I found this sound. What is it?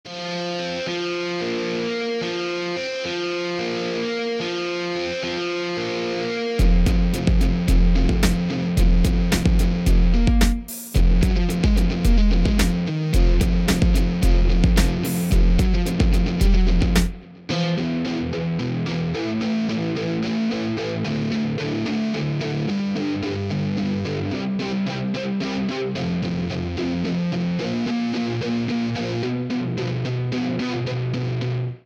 Rock Beat
I was looking through some old files and found this song I made on garageband back in 2015 around the same time as my older popular songs on here.. :) I feel like this song is a good one for any intro to like a podcast, short film or whatever you want. Please if you use it in anything put the link to this download as well as "By Cody Cardinal" beside it.. also additionally you can send me a message so i can see it in use if you would like. :) Hope you enjoy it!
- Cody
Beat, Garageband, Intro, Music, Rock